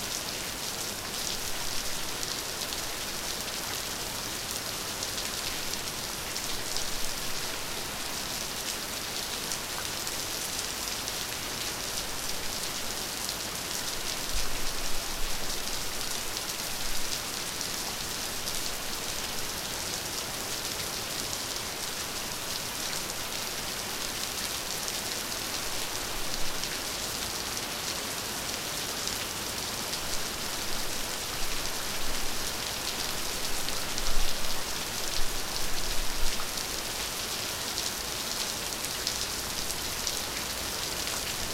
rain session 41sec 2007
Medium/heavy rain with big drops falling on a wet concrete road. Recorded with Oktava 102 microphone and Behringer UB1202 mixer.
field-recording, nature, rain, storm